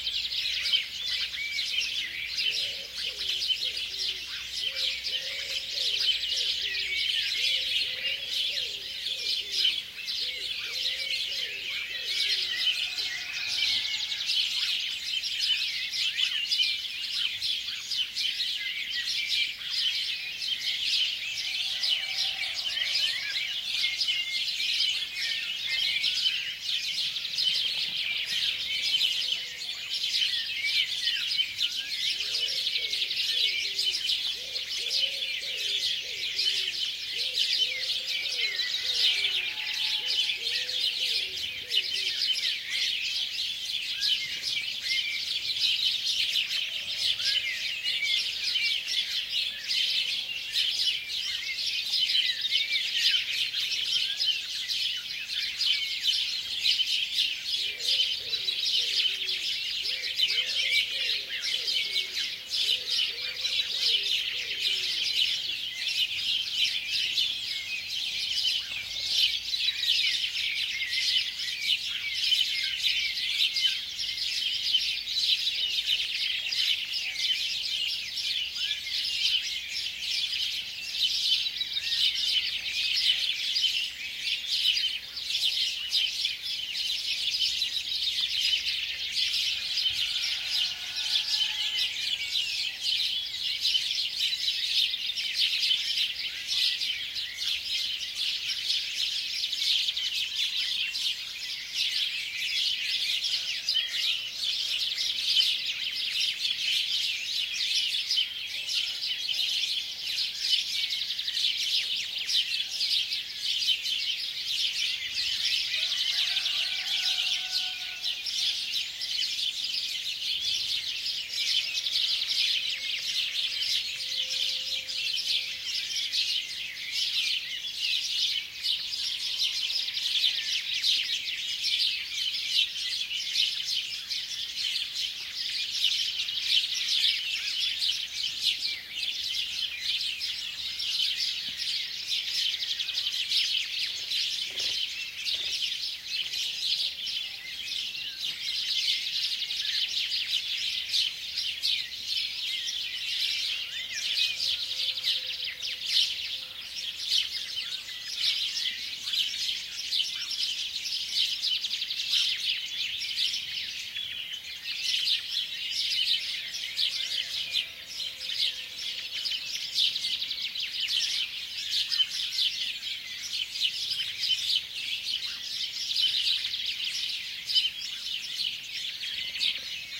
dawn atmosphere, birds chirping and rooster crowing
birds, south-spain, nature, dawn, ambiance, spring, field-recording